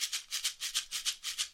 Rainstick Vibration Slow
Native Wooden Rain Stick Hit
Homemade Recording
Part of an original native Colombian percussion sampler.
Recorded with a Shure SM57 > Yamaha MG127cx > Mbox > Ableton Live
Rain, wood, Stick, Latin, sample, colombia